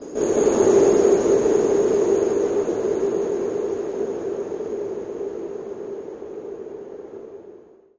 SteamPipe 4 Ambient Landscape C6
This sample is part of the "SteamPipe Multisample 4 Ambient Landscape"
sample pack. It is a multisample to import into your favourite samples.
An ambient pad sound, suitable for ambient soundsculptures. In the
sample pack there are 16 samples evenly spread across 5 octaves (C1
till C6). The note in the sample name (C, E or G#) does not indicate
the pitch of the sound but the key on my keyboard. The sound was
created with the SteamPipe V3 ensemble from the user library of Reaktor. After that normalising and fades were applied within Cubase SX & Wavelab.
atmosphere, pad, reaktor, ambient, multisample